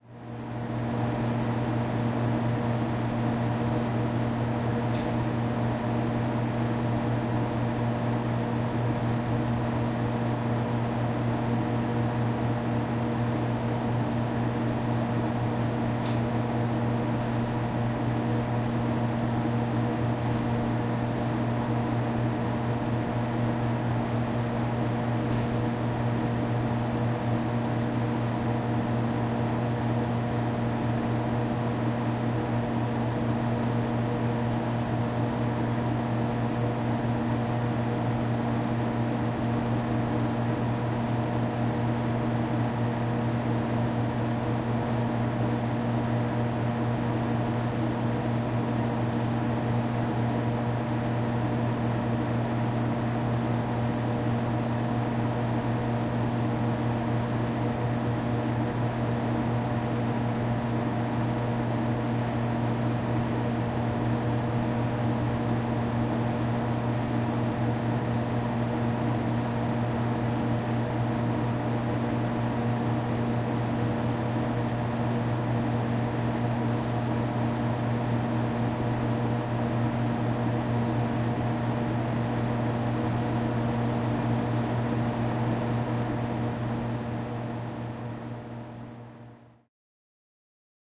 Household Air Conditioner Window Mounted 02
Household Air Conditioner Window Mounted
Conditioner, Household, Mounted, Window